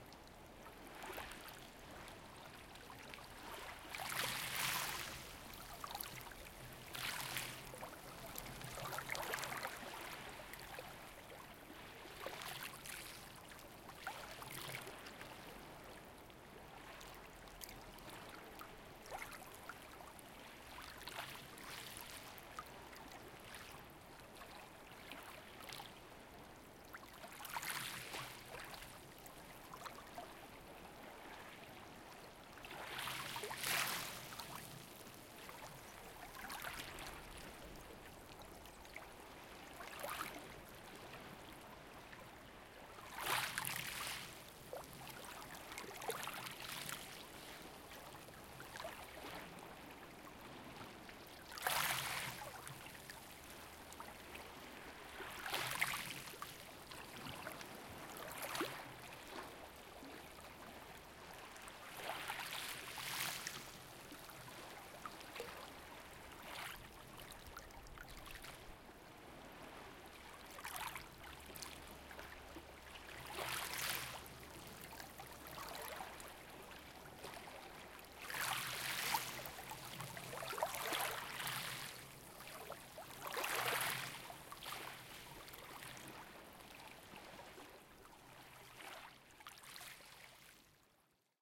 colera sea waves
This is my first recording with the H2n.
I used the surround 4 Ch function to record the
sound of the waves when they arrive at beach.
I recorded this archive on Colera (Catalonia)